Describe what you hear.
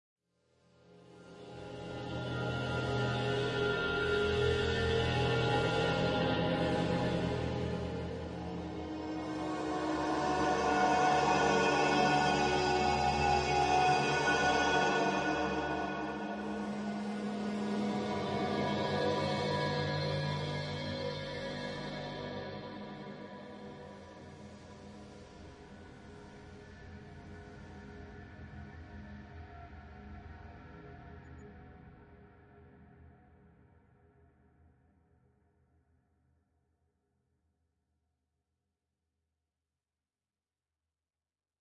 Software - Alchemy, Eventide Blackhole, and Granite